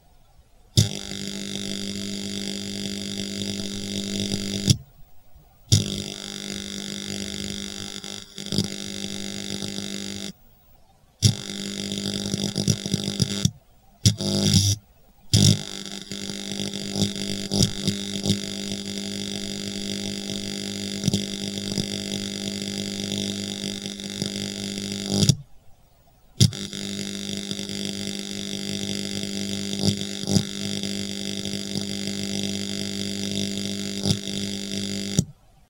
An Arc Welder, zapping.
arc-welder, electric, electricity, electric-shock, shock, welder, zap, zapping